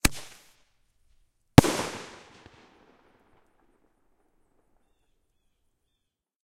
Single shot firework. Has a little of bit background ambience included.